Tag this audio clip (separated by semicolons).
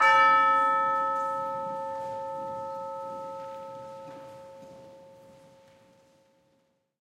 bell; chiming; live; orchestral; tubular; ringing; chime; percussion